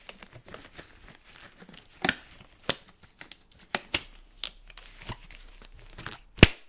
latex glove1.2
A recording of putting on a latex glove, as for a medical examination. Recorded using a condenser microphone, with normalizing with Audacity.
latex, medical, foley, glove, snap